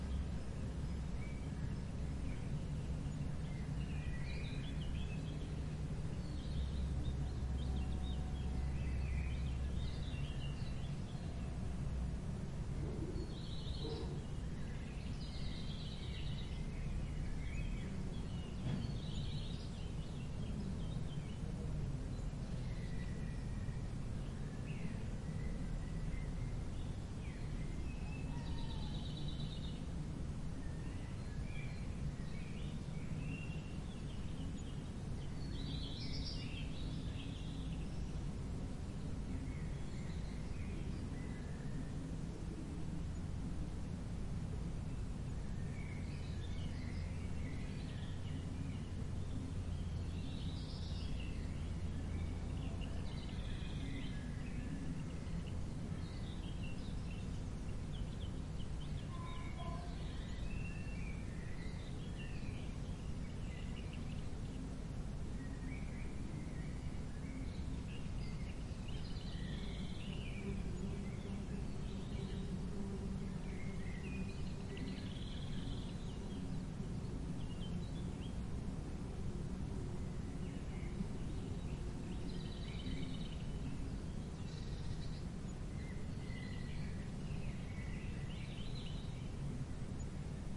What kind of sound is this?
140809 Neuenburg Gate Morning R
Early morning in the gatehouse between the barbacane and the courtyard of Neuenburg Castle, located above the German town of Freyburg on Unstrut.
Lots of natural noises, but with a clearly enclosed characteristic.
Birds and a dog and a rooster from a nearby farm can be heard.
These are the REAR channels of a 4ch surround recording.
Recording conducted with a Zoom H2, mic's set to 120° dispersion.
4ch, ambiance, ambience, ambient, architecture, atmo, atmosphere, background-sound, bird, birds, castle, early, enclosed, Europe, field-recording, Freyburg, Germany, morning, nature, Neuenburg, surround, traffic, tunnel